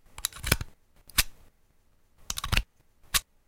usb disk sounds
2 variations of inserting and extracting a usb disk.
disk, extract, no-background-noise, unplug, click, usb-disk, insert, pluging-in, inserting, drive, slide, usb, unpluging